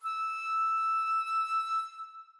Flute Sustained 5th
These sounds are samples taken from our 'Music Based on Final Fantasy' album which will be released on 25th April 2017.
Flute, Music-Based-on-Final-Fantasy, Reed, Sample